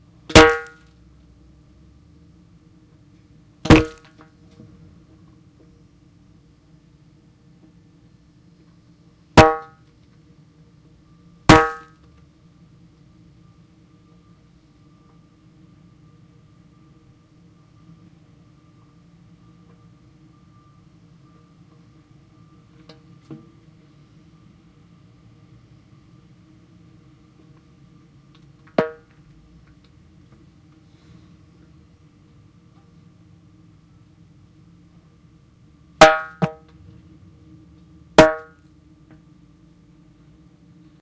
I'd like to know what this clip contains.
tire percussion solo
Some files were normalized and some have bass frequencies rolled off due to abnormal wind noise.